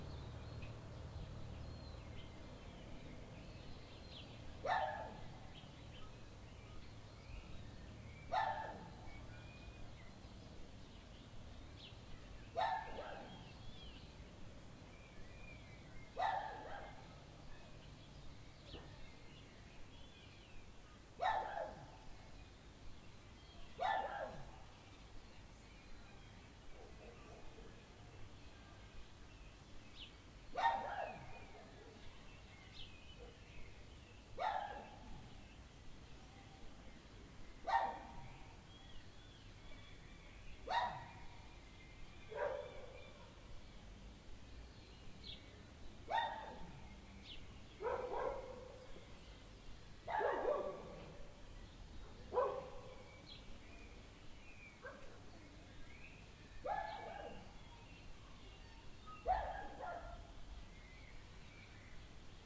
This was recorded in my yard in the suburbs of Hastings, Hawke's Bay, New Zealand.
It was recorded at around 6pm on a Saturday evening in September 2016 with a Zoom H4n.
You can hear my neighbour's dog barking, and birds tweeting. Towards the end of the recording two other neighbourhood dogs join in the barking.